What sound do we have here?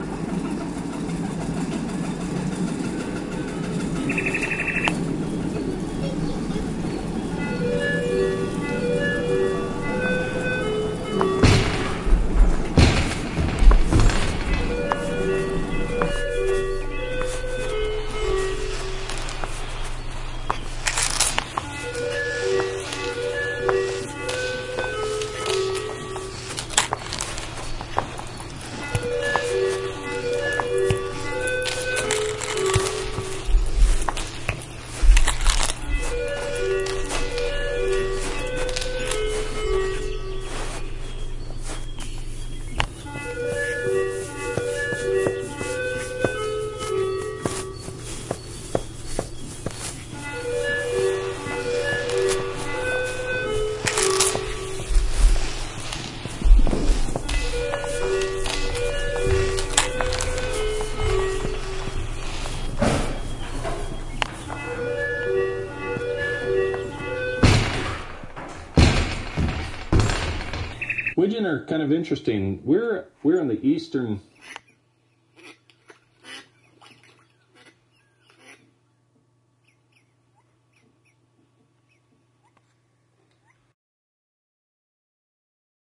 The Eastern Side Of Things
fieldrecordings, Finland, sonic-postcard